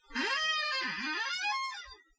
leaning on my computer chair that desperately needs some WD-40.